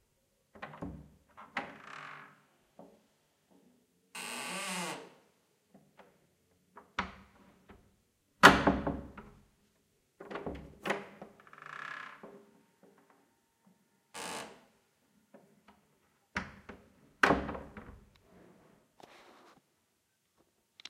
Room door open and close
The sound of a traditional house/rooms door. Its made of wood and not at all big or heavy.
creak, door, house, room, wooden